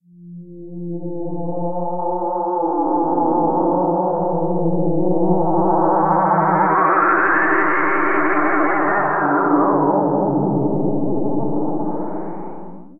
Flying saucer swoops, hovers, zooms away. Like all the files in the
Saucer Sounds sample pack, this is generated by passing a 3-operator FM
synth signal through a cheesy all-pass reverb section and a sweeping formants section, with vibrato and portamento thrown in to complete the horror. Can be looped from 5.002789 to 9.998209 seconds.